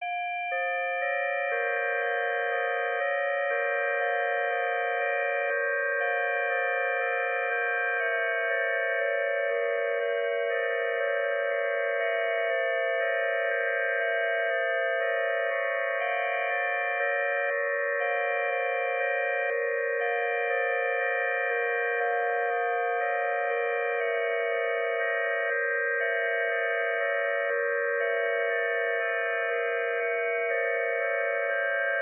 old music file on my computer labeled 'attack on earth' I clearly never finished whatever I was trying to work on. Made using the VST micromodular and FL studio 10 some time in 2012.
synthesizer, dark, bells, ambient, chime, melodic, bell, cathedral